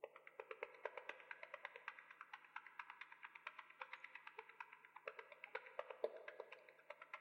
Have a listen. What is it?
Ant running
Ant sound effect by making noises into the mic, then EQ and reverb
animal, ant, antennae, communicate, creature, creepy-crawly, insect, insects, nature, running, scutter, scuttering